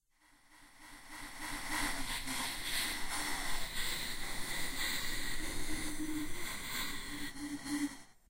Female Ghost Crying
Me crying, messed with in audacity. If you want, you can post a link of the work using the sound. Thank you.
cries, girl, voice, talk